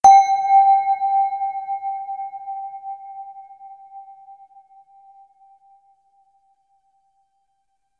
Synthetic Bell Sound. Note name and frequency in Hz are approx.
electronic, sound-design, ring, synth, ding, digital, bell, processed